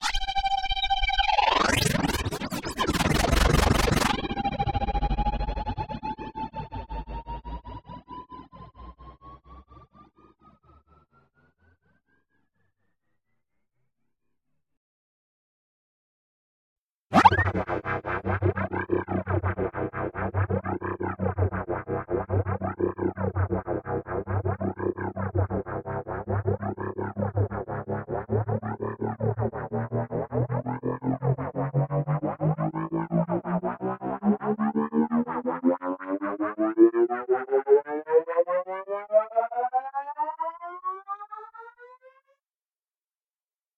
Super processed sounds resembling space vehicles.
vehicle, machine, crazy, space